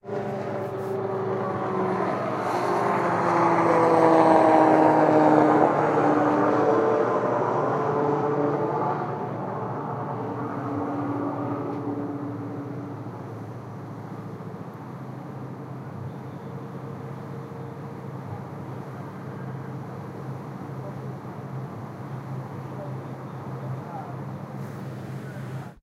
An airplain Flighting over the boat.